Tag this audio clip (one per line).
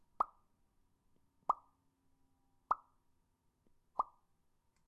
Balloon
Disappear
High
Homemade
Light
Pop